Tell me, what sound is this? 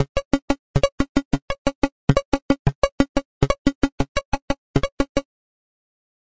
17 ca dnb layers
These are 175 bpm synth layers maybe background music they will fit nice in a drum and bass track or as leads etc
atmosphere, bass, beat, club, dance, drum, effect, electro, electronic, fx, house, layers, loop, music, rave, sound, synth, techno, trance